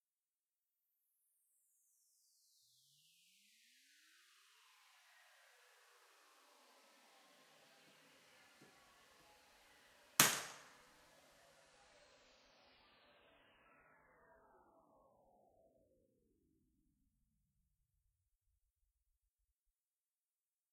Impulsional Response from 3r floor hall Pompeu Fabra University
Impulsional response recorded with Behringuer ECM800 and M-audio soundcard. Hall of the 3r floor of Pompeu Fabra University (Poblenou, Barcelona).
convolution, impulse, response